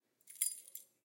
Keys Handling 5
The enjoyable and satisfying clinking symphony of handling keys on a ring
clink, drop, foley, handling, jingle, jingling, key, keychain, keyfumble, keyinsert, keylock, keyunlock, scrape, sfx, soundeffects, turn